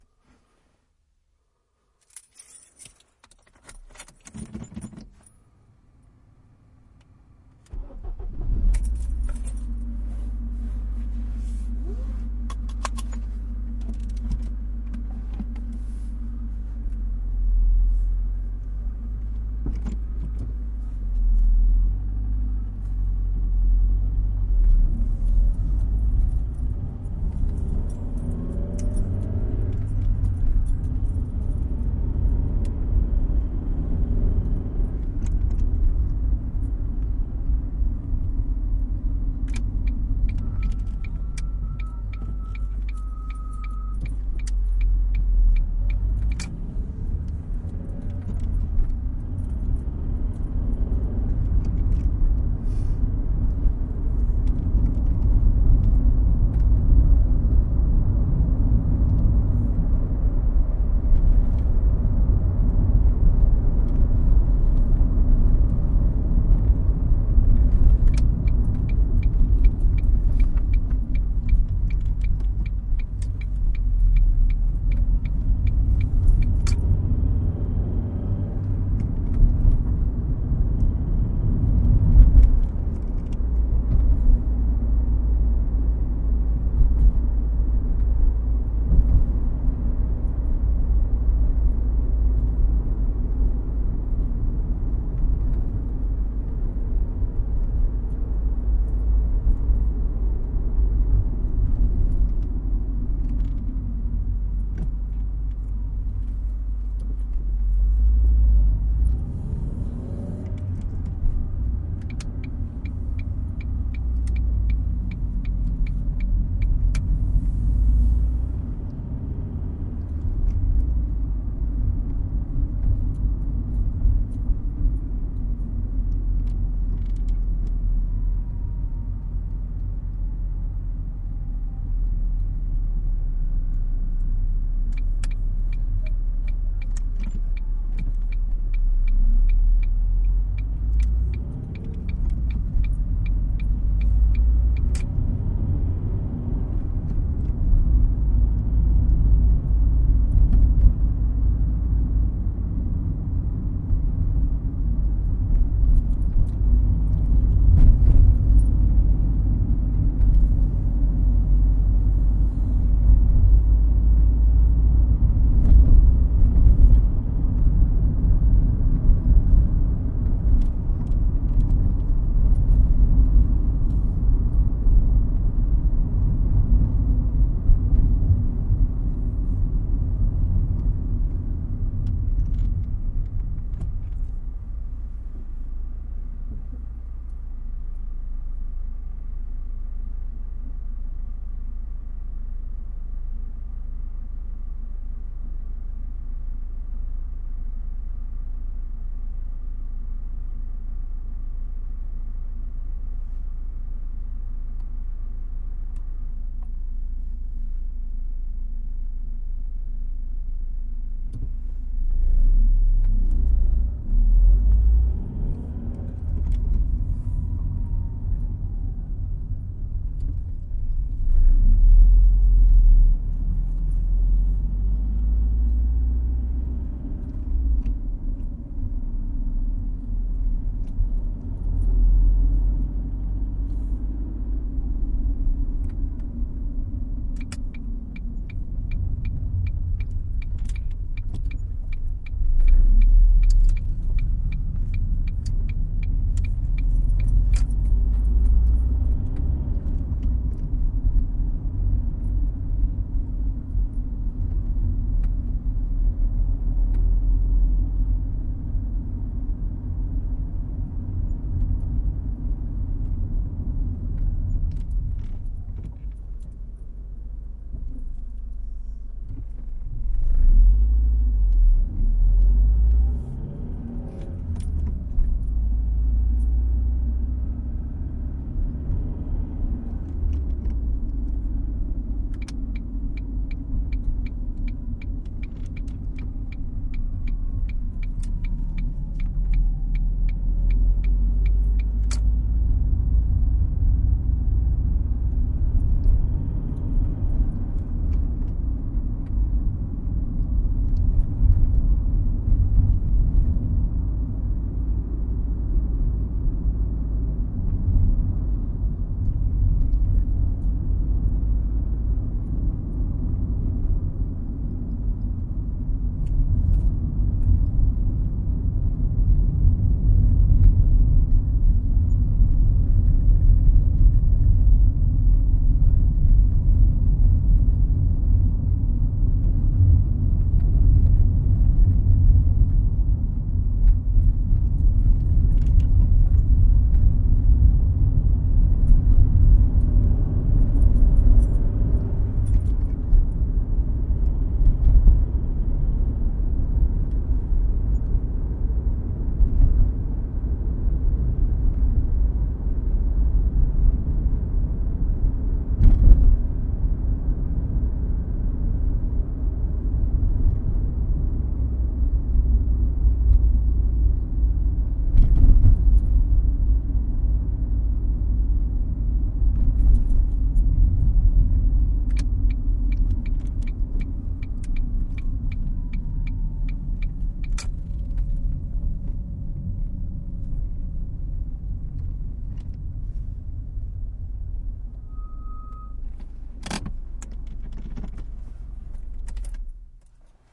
Car journey with windows closed
This is a recording of a short car journey across town. The main sound comes from the car engine, but you can also hear the gear stick being changed, car keys jangling, indicators, etc.
drive, journey